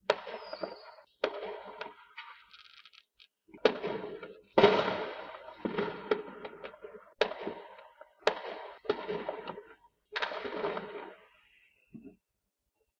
Few firework booms recorded using my PC microphone. I cut out silence between booms and removed some noise.
firework, fireworks